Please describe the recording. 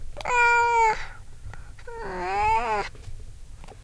my cat meowing